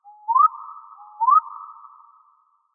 Small bird jungle sounds v3
This sounds like a bird twittering in a jungle or something like that, for Thrive the game. Made in Fl Studio 10 from a segment of the Motorcycle/Helicopter sound that i reversed. I used Harmor, Vocodex and other Image-Line plugins like Fruity Reverb 2, Fruity Parametric EQ 2 and EQUO.
Reverb and delay to make it more realistic and immersive.